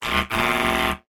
Sound of a negative response when selecting wrong stuff from menu.
Or error sound of a computer.